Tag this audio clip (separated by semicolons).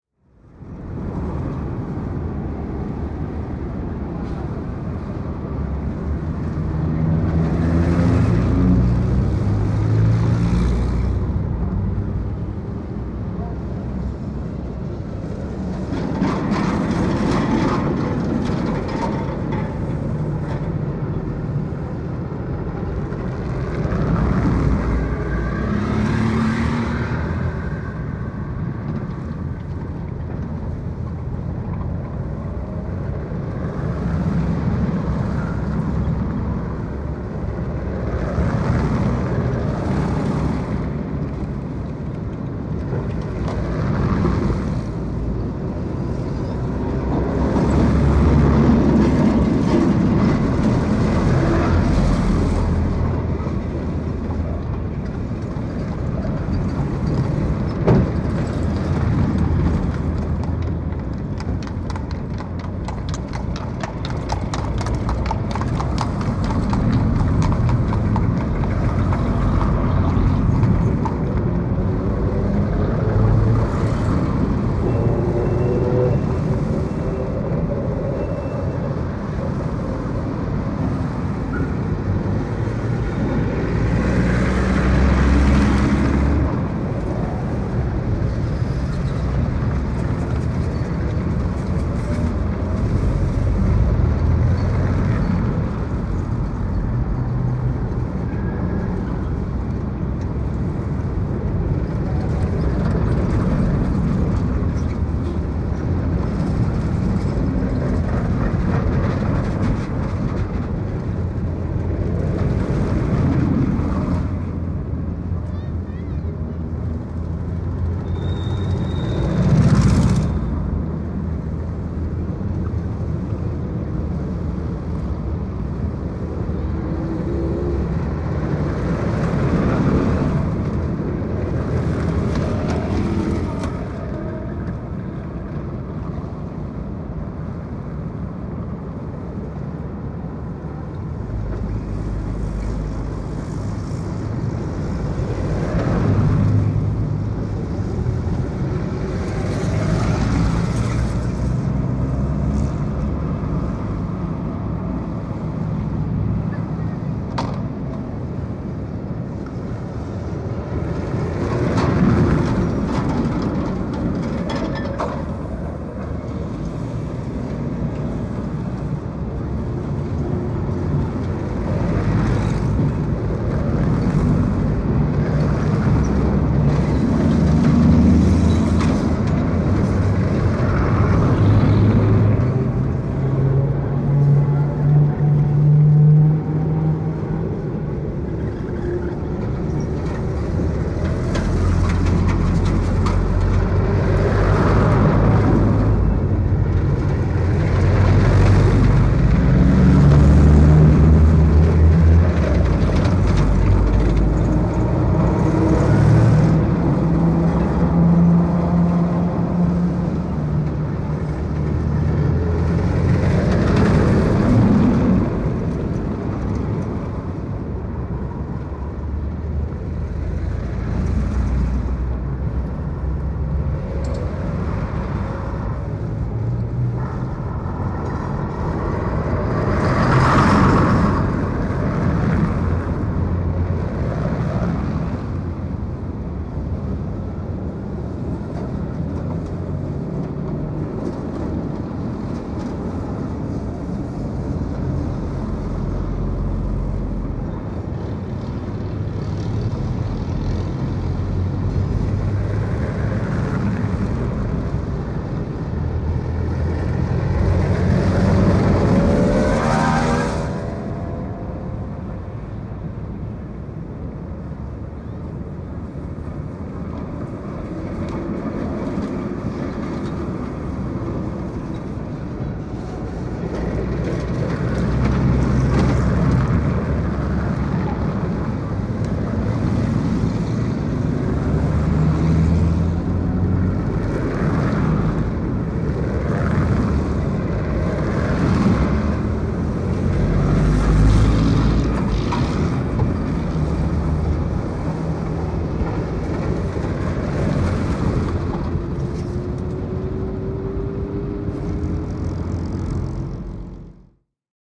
City; Field-Recording; Finland; Finnish-Broadcasting-Company; Katu; Kaupunki; Soundfx; Street; Suomi; Tehosteet; Traffic; Yle; Yleisradio